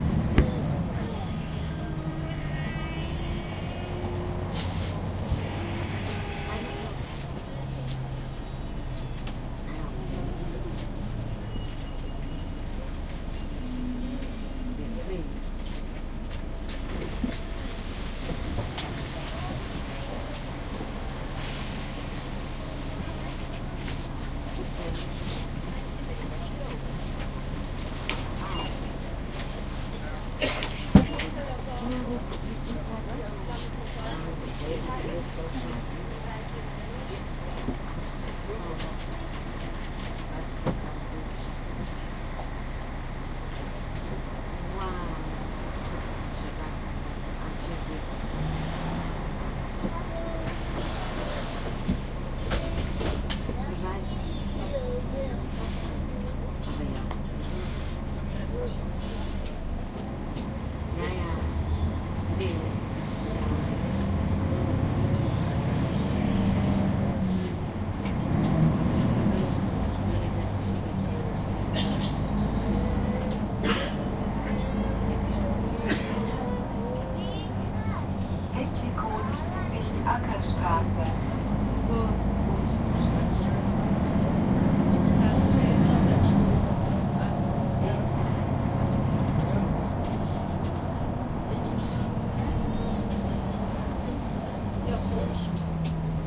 On the bus #3
The third field-recording while I was on the bus. Recorded with Zoom H2N. No editing done.
ambience, transportation, public, field-recording, bus